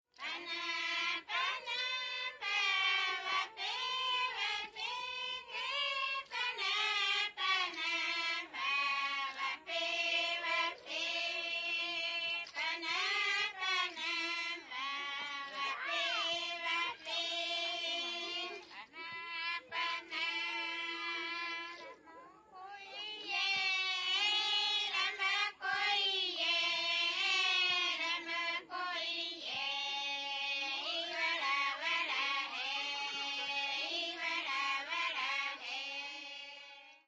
Nira's Song number 4 from the "Kayapo Chants". Group of female Kayapó native brazilian indians finishing the ritual of the warrior, in "Las Casas" tribe, in the Brazilian Amazon. Recorded with Sound Devices 788, two Sennheiser MKH60 in "XY".
music, female-voices, indian, amazon, voice, brazil, native-indian